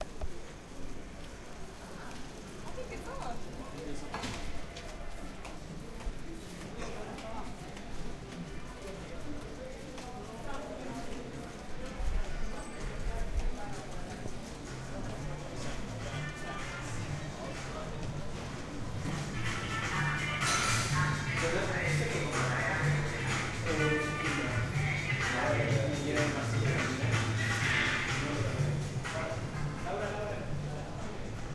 bike walking entering bar
Walking down a small street at night and entering a bar